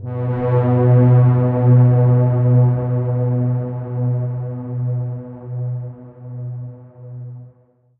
SteamPipe 7 DarkPad G#3
This sample is part of the "SteamPipe Multisample 7 DarkPad" sample
pack. It is a multisample to import into your favourite samples. A
beautiful dark ambient pad sound, suitable for ambient music. In the
sample pack there are 16 samples evenly spread across 5 octaves (C1
till C6). The note in the sample name (C, E or G#) does not indicate
the pitch of the sound but the key on my keyboard. he sound was created
with the SteamPipe V3 ensemble from the user library of Reaktor. After that normalising and fades were applied within Cubase SX & Wavelab.
ambient, multisample, pad, reaktor